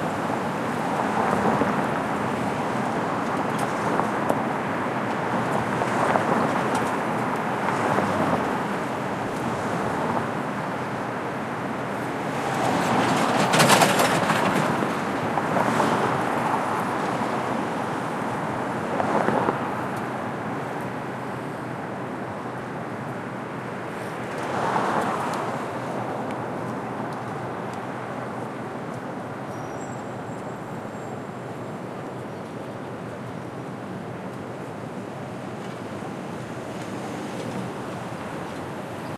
Field recording of Times Square in New York City recorded at 6 AM on a Saturday morning. The recorder is situated in the center of Times Square, some cars (mostly taxis) are underway, some (very few) people as well, cleaners and a team of subway construction workers are on the scene.
Recording was conducted in March 2012 with a Zoom H2, mics set to 90° dispersion.
atmosphere, people, cars, NY, mid-range, morning, traffic, street, ambience, ambient, noise, New-York, Times-Square, field-recording, city, soundscape